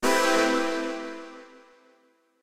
music game, dun 4
Music created in Garage Band for games. A dun-like sound, useful for star ranks (1, 2, 3, 4, 5!)
dun, game, game-music, music, music-game, score